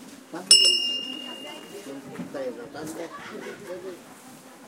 bell strikes once. Recorded at Puerto Consuelo (near Natales, S Chile)
field-recording, door, bell